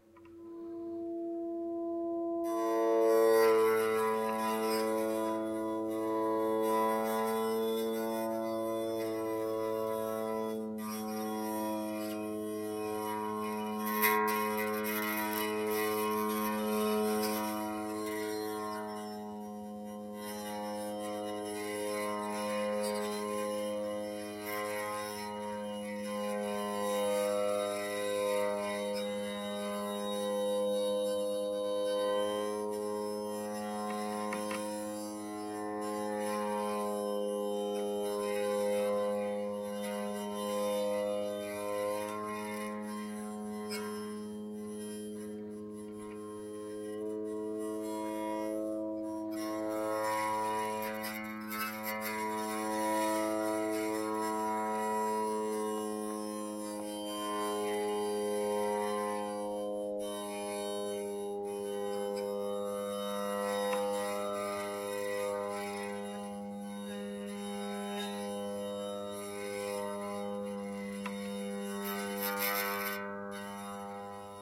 Recorded with ZOOM H1 near the strings while I makes a drone sound with the Ebow. Guitar is a Jazzmaster. No amplifier used.